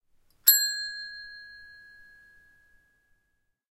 Raw audio of a counter bell being struck - the bell you would usually press to let the owner of a store know you are there and waiting.
An example of how you might credit is by putting this in the description/credits:
The sound was recorded using a "H1 Zoom recorder" on 15th November 2017.